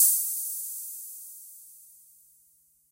MAM ADX-1 is a german made analog drumbrain with 5 parts, more akin to a Simmons/Tama drum synth than a Roland Tr-606 and the likes.
adx-1, analog, drumbrain, hihat, mam, singleshot